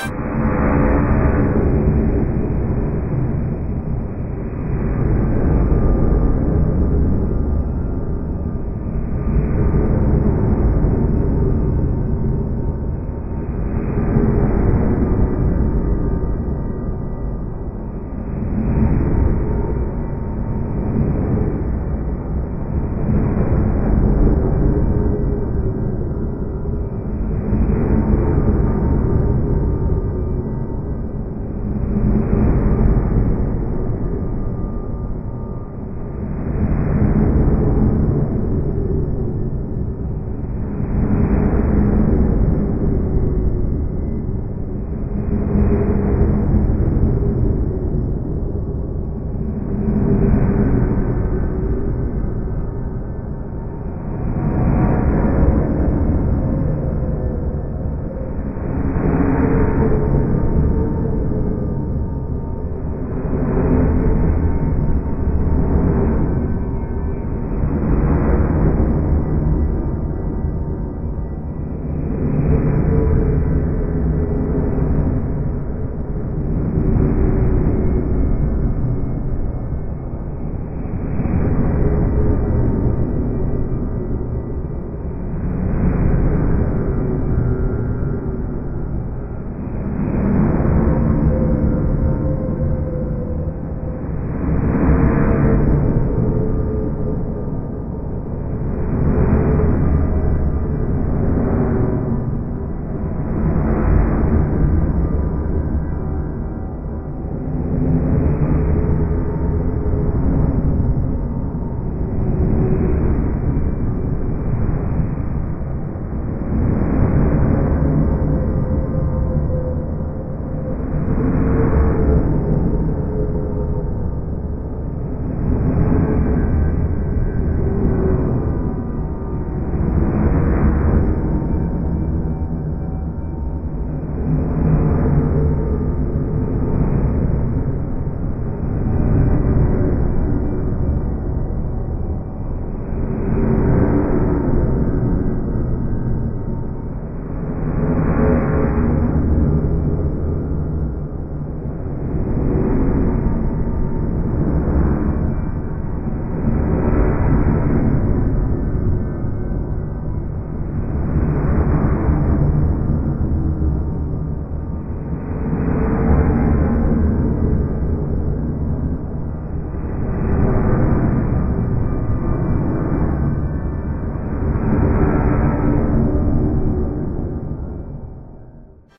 deep under
Weird underground noises. Creepy atmosphere.
mine, echo, scary, creepy, cave, weird, factory, forge, ghostly, cavern, hell